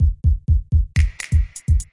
4 bar loop2
4 loops taken from a single On Road project, each loop is 4 bars long, at 125bpm.
Sounds closet to Hip Hop.
On-Road, 4-bar